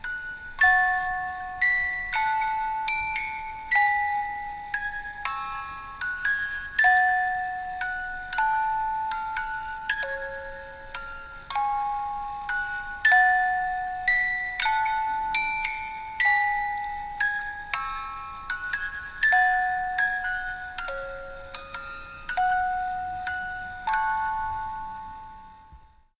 This is my very old, rusty, squeaky music box that plays "What Child is This." It's so old that it plays really slowly, so I had to speed it up electronically. You can even hear the whirs it makes.
greensleeves, music, xmas, antique, music-box, christmas